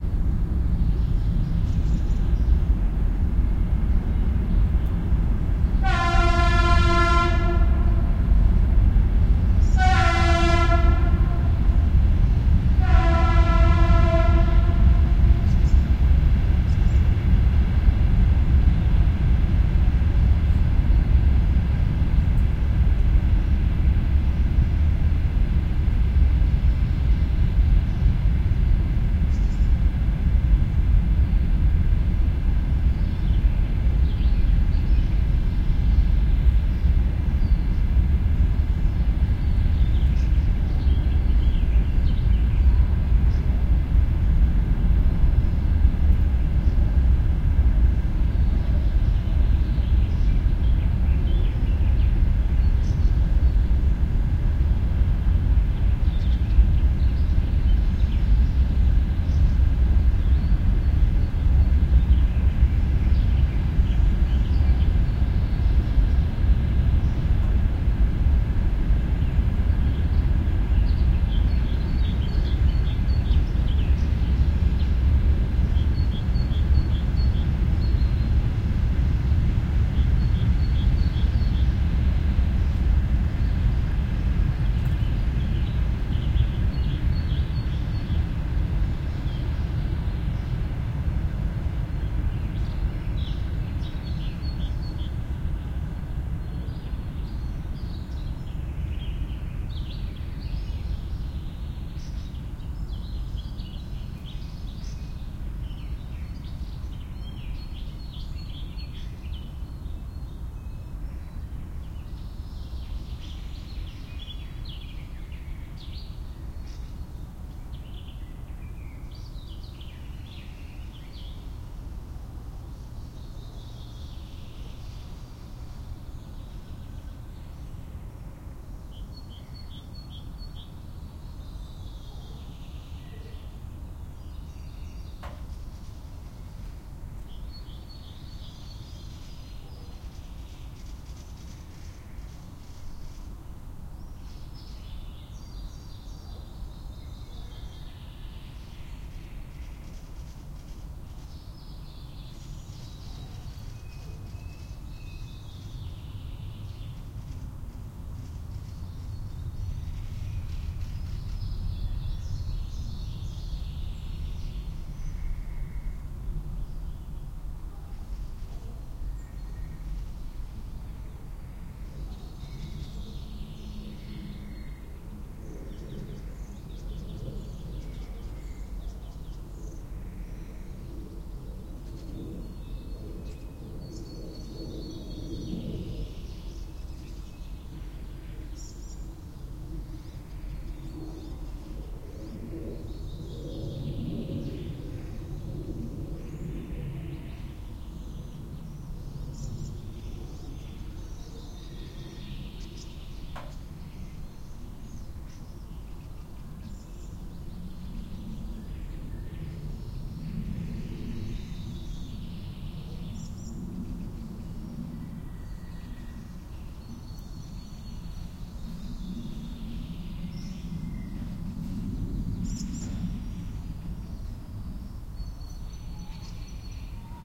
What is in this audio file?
Suburban ambience - Moscow region, distant train pass-by, birds, airplane pass-by, summer
Roland R-26 OMNI mics